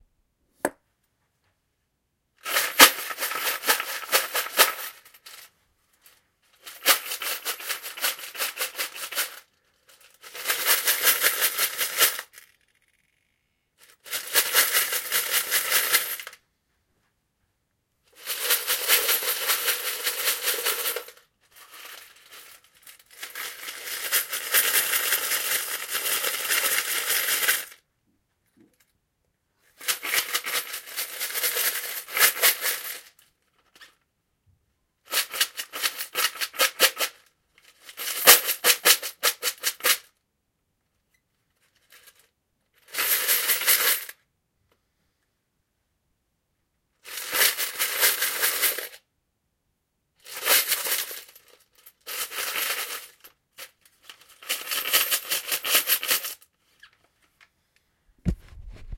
money shaken in bucket
Change shaken in a bucket. Perfect if you need a charity sfx. Recorded with a Zoom H1. Neutral background.
Money, shake, bucket